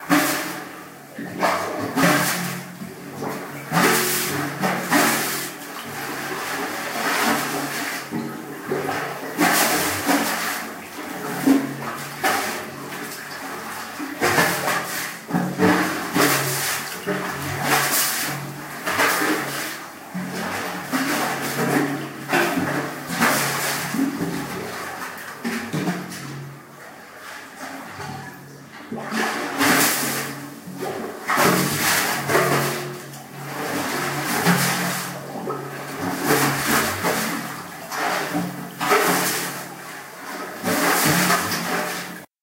Water splashing in and out of a drain at Lake Pontchartrain, New Orleans. Recorded with an iPhone placed above a manhole cover.
water, sloshing, manhole, splashing, drain-pipe, field-recording